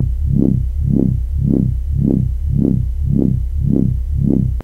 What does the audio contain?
Tention Builder
a slow bass wobble. sound created on my Roland Juno-106